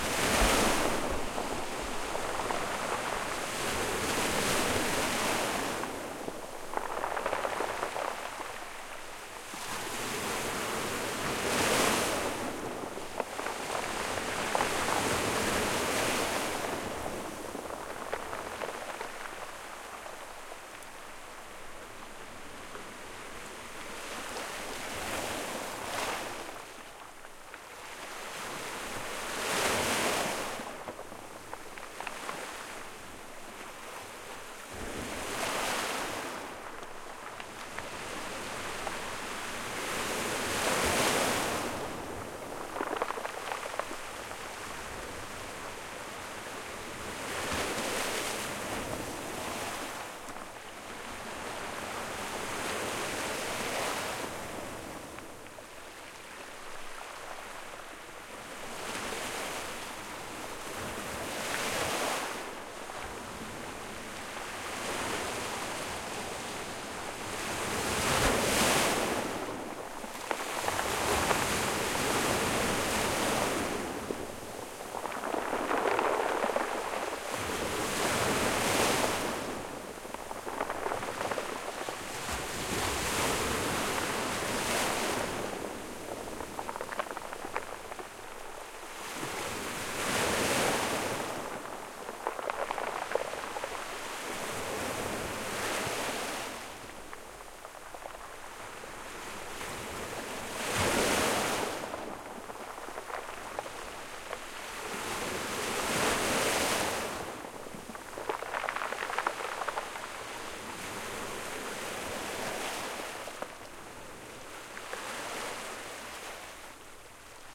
waves pebble beach frothy close2
waves pebble beach frothy close
close, frothy, pebble, waves